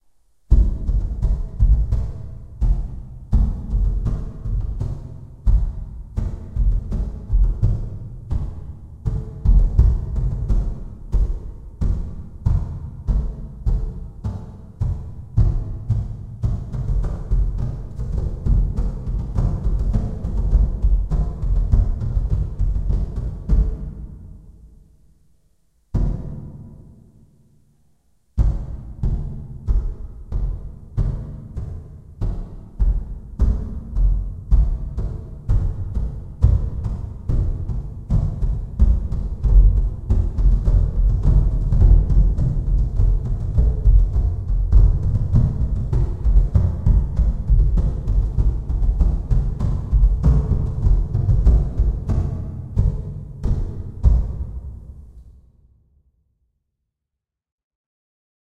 Celtic Drum
A nice Celtic hand drum, recorded using a Samson USB Studio Condenser, and altered in Mixcraft 5. Sound created on the evening of Feb. 11, 2015.